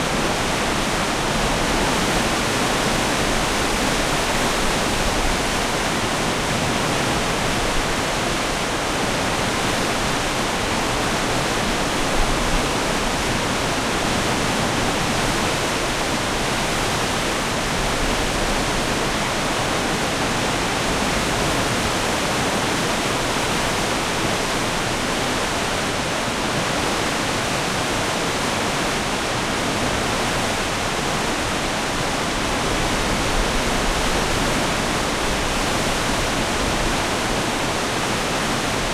water waterfall large heavy int gushing water treatment plant1
gushing, heavy, int, large, plant, treatment, water, waterfall